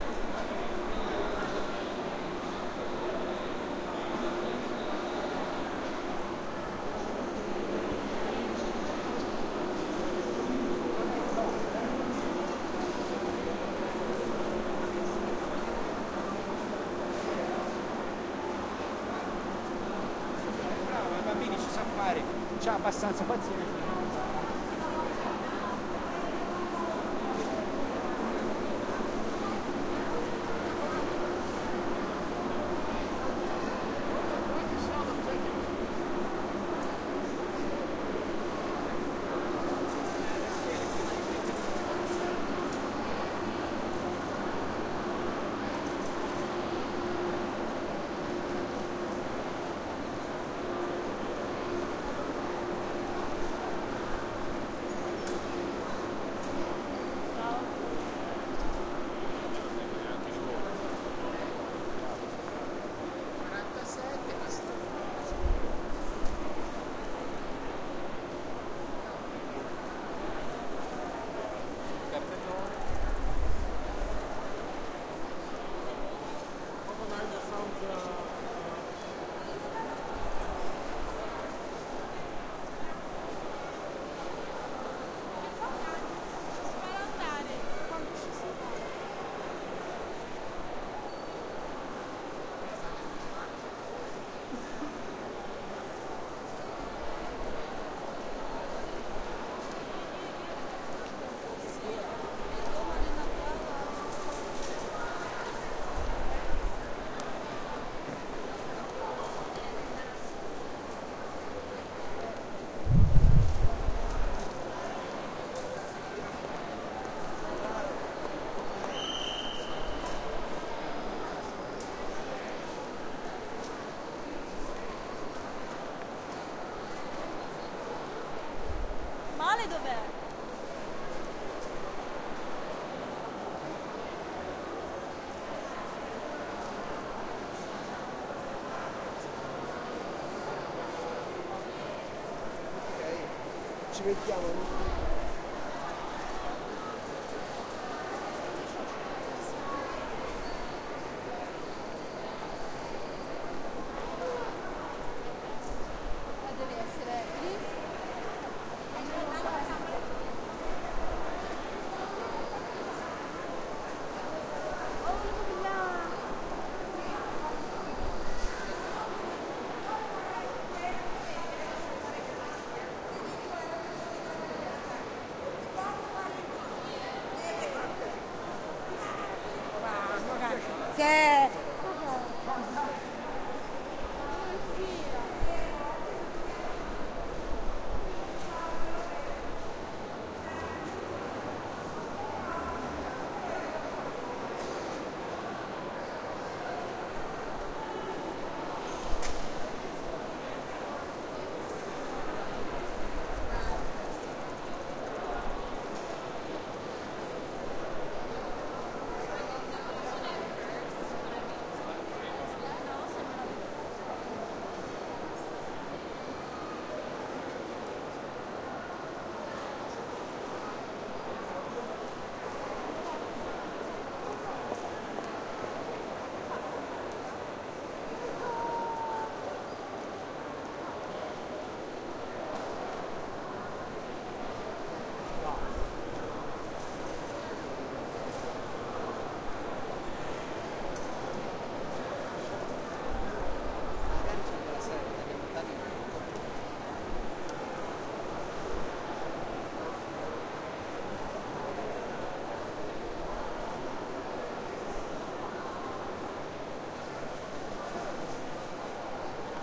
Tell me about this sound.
Stereo Ambience recorded at central train station in Roma
Amb, Italy, Roma, Station, Train, ambience